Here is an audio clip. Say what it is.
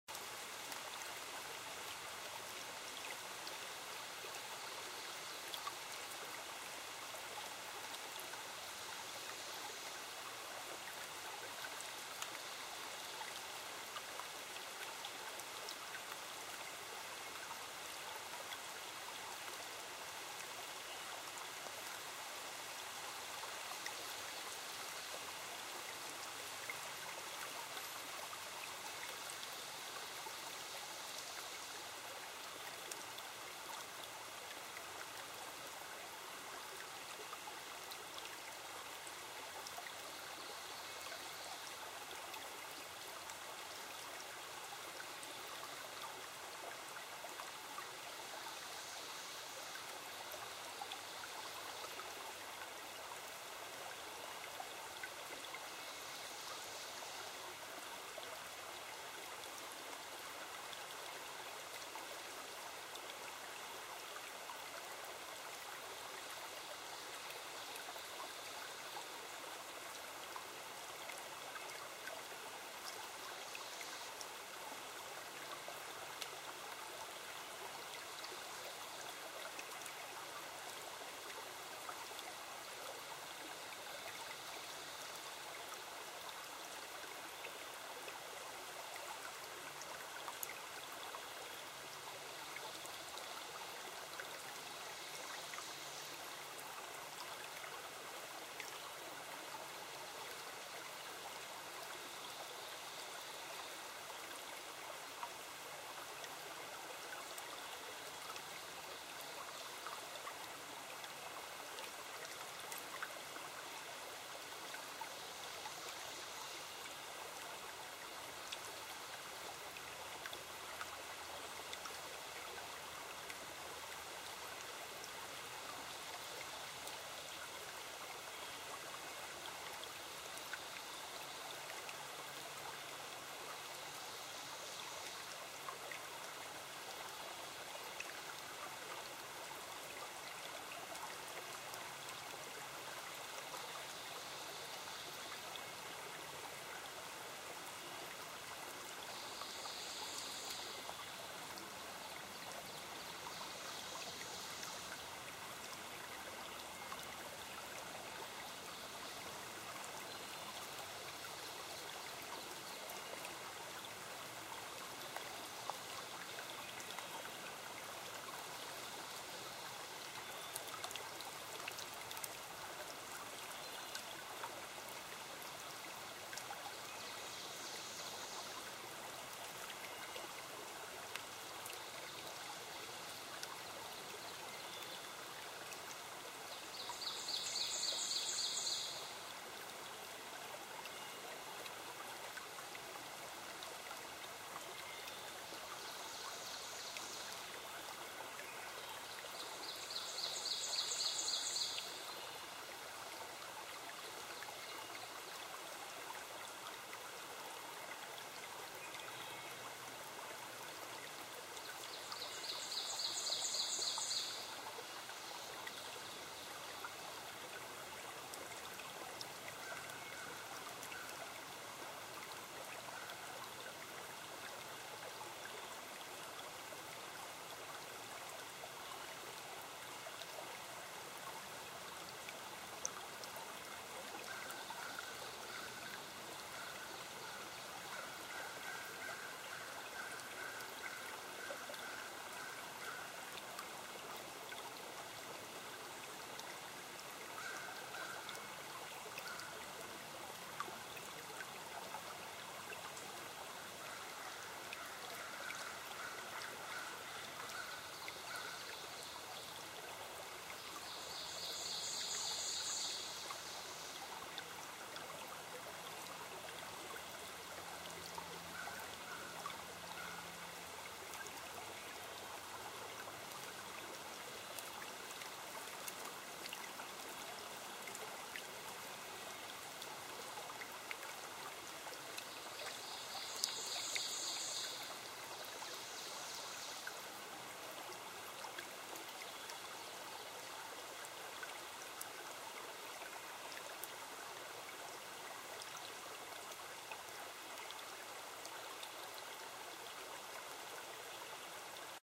Rain Sound and Forest and Nature Sounds
Recorded in my secret spot in the Adelaide Hills, you can hear a stream, crickets and some birds. This part of the forest is away from noise and people, nature makes cool sounds!
You can use this for whatever you like.
ambience, ambient, birds, field-recording, forest, nature